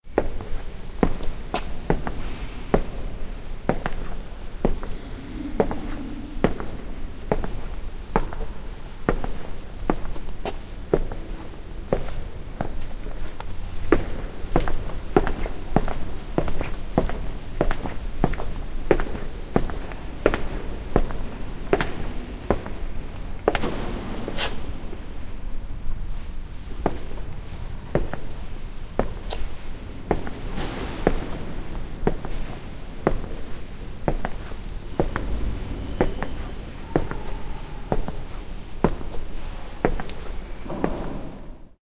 steps in church
church, empty, steps